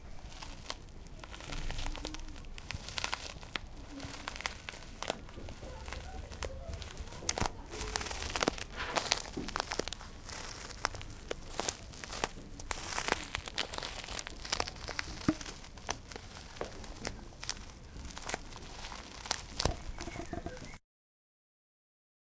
Pas dans la neige
Human or animal steps in the snow made with fingers pressing a bag with hard salt.